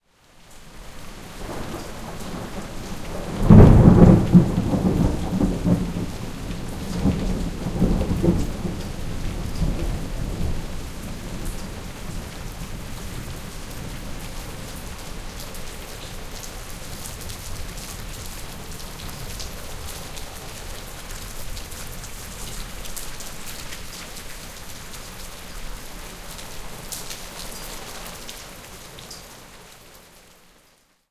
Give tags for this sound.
low; thunderclap

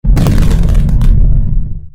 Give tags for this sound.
sound-effect; magic-spell; flames; crackle; fx; flame; sound-design; rpg-game; magic; game; sound; fire; game-sound; rpg; spark; sfx; burning; effect; free; magical; scorch; burn; spell; cast; scald

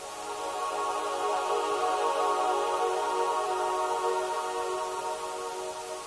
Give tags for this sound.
ambient; magic; mystic; pillai

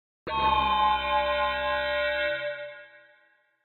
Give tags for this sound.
Edited; Free

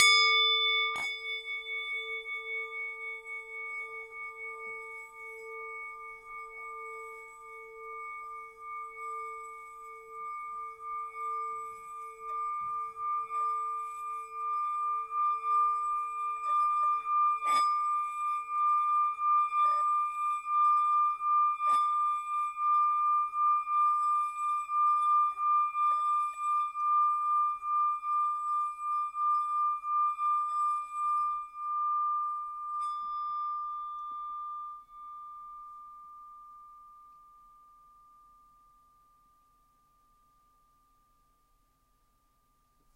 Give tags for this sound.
bowl; singing; tibetan

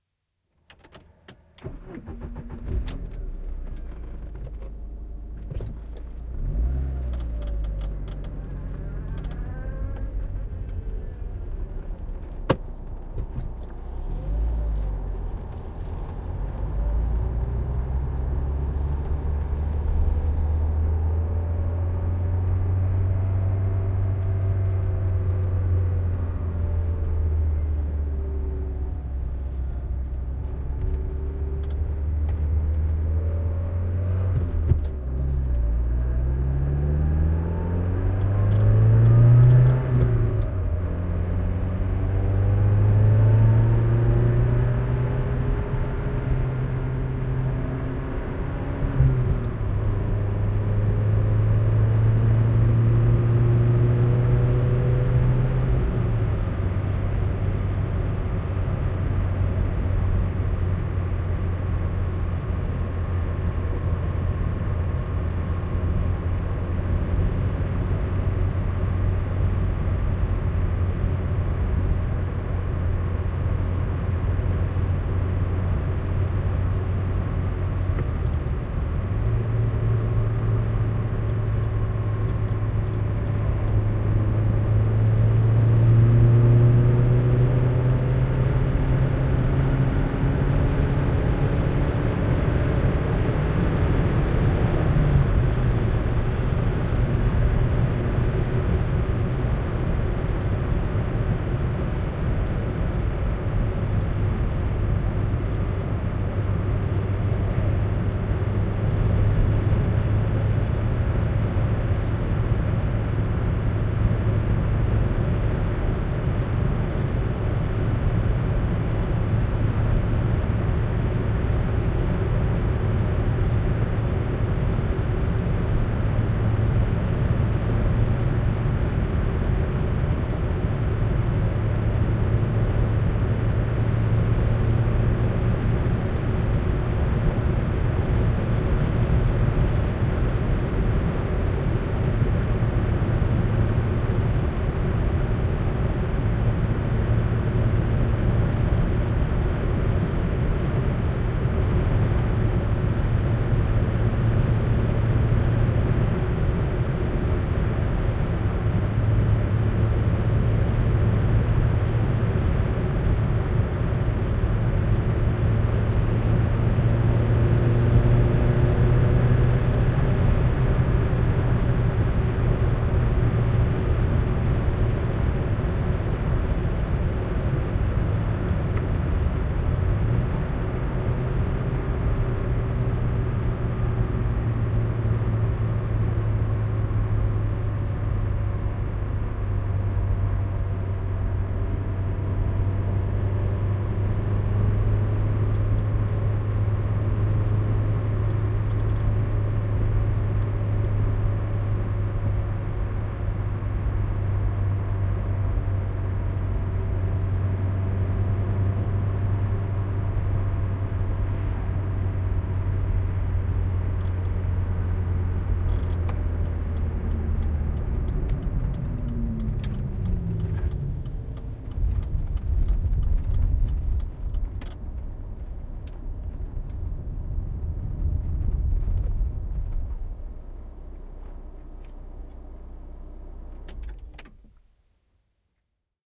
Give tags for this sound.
Yle; Driving; Cars; Interior; Autot; Tehosteet; Run; Finland; Finnish-Broadcasting-Company; Suomi; Auto; Field-Recording; Ajo; Soundfx; Ajaa; Yleisradio; Autoilu; Drive; Car

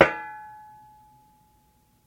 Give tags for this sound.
Impact; bang; tink; Hit; Metal

